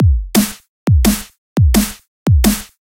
DnB Loop
Simple Drum & Bass loop made with stacked drums.
Each drum sample, if you'd like to use them separately:
snare, drumnbass, drumandbass, kick, drum, loop, dnb, drums